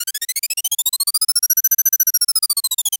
sonokids-omni 01
abstract, analog, analogue, beep, bleep, cartoon, comedy, electro, electronic, filter, fun, funny, fx, game, happy-new-ears, lol, loop, ridicule, sonokids-omni, sound-effect, soundesign, space, spaceship, synth, synthesizer, toy